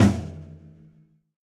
a percussion sample from a recording session using Will Vinton's studio drum set.